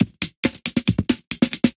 Amen 8-bit
I Used some compression and a bit of EQ to make it sound like something you'll hear on a gaming console machine. However, the audio spikes from the High Freq took me a while to cut off. Can't figure out why it does that but in my opinion it still sounds good :)